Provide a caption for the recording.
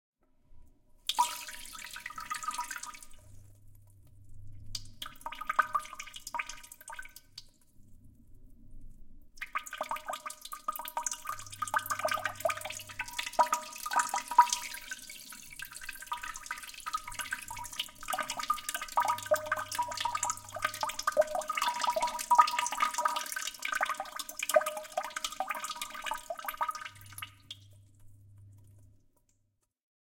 20190102 Spraying Water into the Toilet 04
Spraying Water into the Toilet
bathroom, plumbing, spray, toilet, water